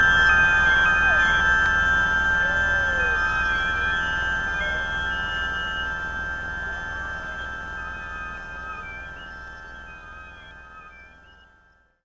A reverb tail from playing a Rhodes piano mixed with some glitchy and adjusted field recordings.